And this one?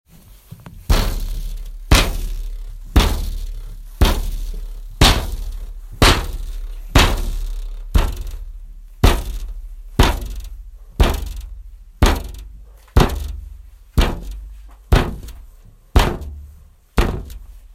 Made this sound only using my hands to bang the downstairs window at a bookstore called Nerman's Books and Collectibles on Osborne Street.